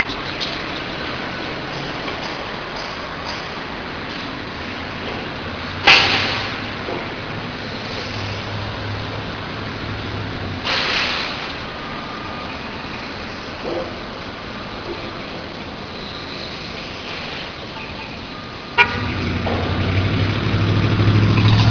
construction soundsB
construction, low-tech, motor, sounds, vehicle